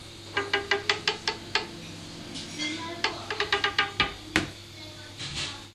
The sound of an old spring door closer in a busy Café toilet.